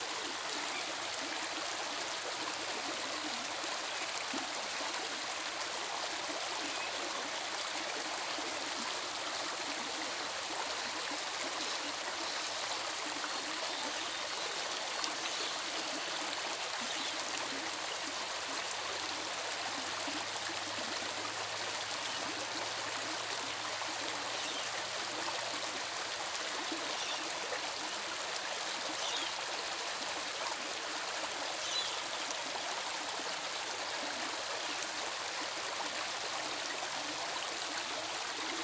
The sound of a river, including some background sounds like birds. The river is medium in size with a good amount of force, but not the most furious river in the world. The water was rushing over stones. Recorded in Australia, and looped in REAPER.
Have a sound request?